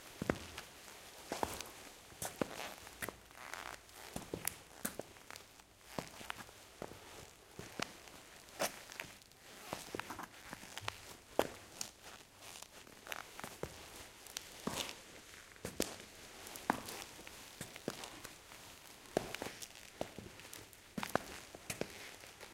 Person in a room, walking with leather shoes on a concrete floor, to and from the microphone, some noise of clothes can be heard as well
foley, leather, shoe, squeak, turn, walk